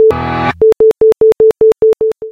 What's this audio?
I imported one noise of piano and applied a shape of square wave of one second. There is a cutting of duration with alternation of sound and silence. Then the sense was inverted. And I added a fade-out.
piano, silence, wave